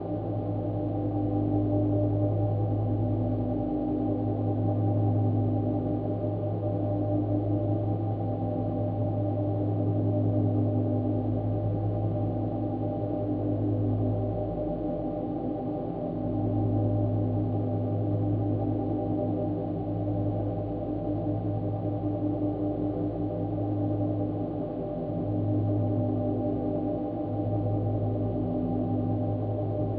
Pad created by recording two different chords and stretching the final result.